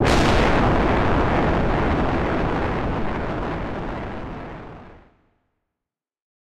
Thunder 2 (30% Reverb)
A single clap of a sound that sounds similar to thunder with 30% reverb.
single, storm, thunderclap